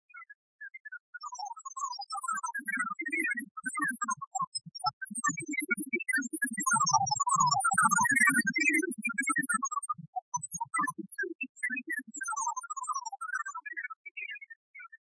Created with coagula from original and manipulated bmp files.
space, image, ambient, synth